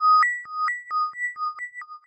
Fading signal
This is a sound effect I created using ChipTone.